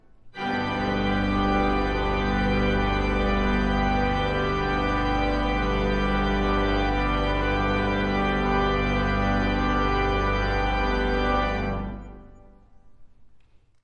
Church Pipe Organ Chord
The final chord of a pipe organ performance. Played loud and proud. Long file is good for wavetable resampling and etc.